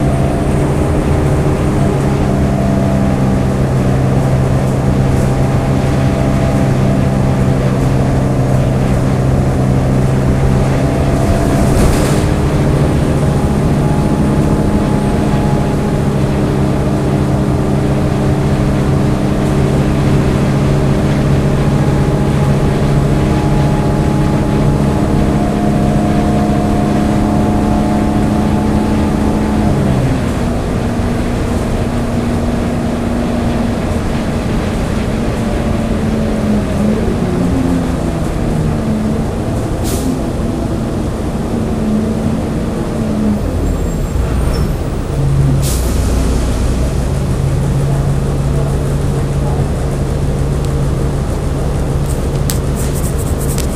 One of a series of recordings made on a bus in florida. Various settings of high and lowpass filter, mic position, and gain setting on my Olympus DS-40. Converted, edited, with Wavosaur. Some files were clipped and repaired with relife VST. Some were not.
ambience, bus, engine, field-recording, interior, transportation